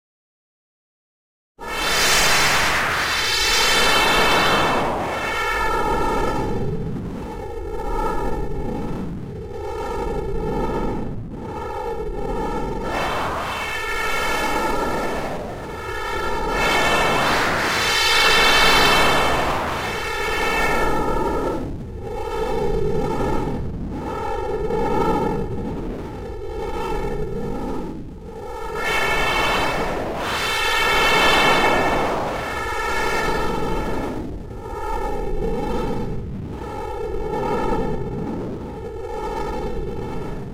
Mchn AmbienceAA
Part of a Synthetic Machinery Audio Pack that i've created.
amSynth and a load of various Ladspa, LV2 filters used. Enjoy!
Machine, Machinery, Mechanical, Sci-fi, Synthetic